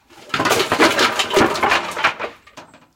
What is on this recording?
falling-planks-watering-can-platic-toys
A medley of planks, a watering can, some plastic toys, al stacked and then pushed over, crashing on the stone floor outside my house.
crash, falling-planks